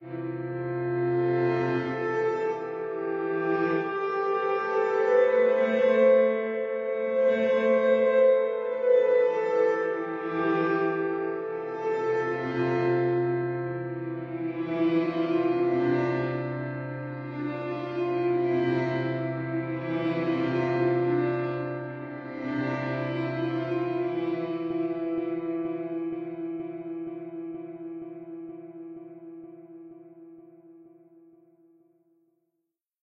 PianoAbstract02 reverseComposition ubik
I didn't know what to call this sample.. so I just called it what it was. This started out as a piano score I wrote earlier today.. and I really liked the melody and wanted to use it in a song so I rendered out a variation of it with alot of effects and bits and pieces of itself reversed and arranged in my own strange way for TheFreeSoundProject, lol.
delay, echo, effect, experimental, fx, melody, piano, processed, reverb, reversed, reversed-piano, soundeffect, synth, synthesizer, weird